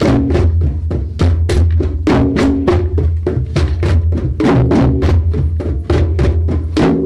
A group in an introductory hand-drum class plays a rhythm, and it gets recorded by a portable cassette recorder with distortion.